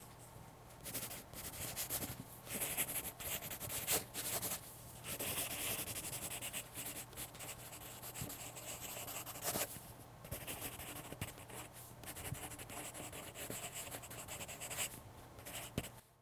Writing with pencil 2
Writing with a B(#1) pencil on paper.
paper, pencil, scribbling, writing